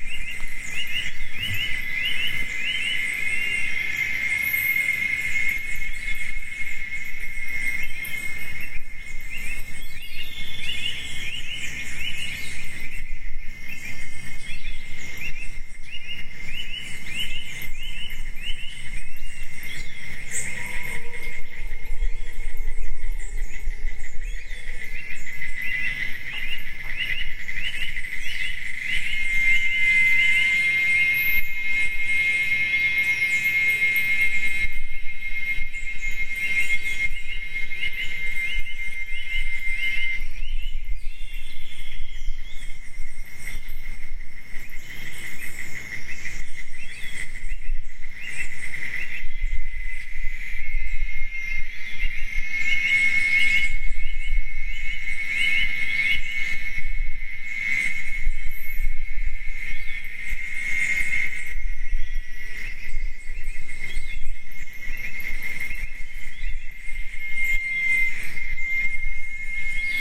Tropical Dawn
1 of 6 series recorded at Dawn in jungle of Costa Rica.